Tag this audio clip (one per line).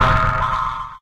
electronic
spacey
industrial
effect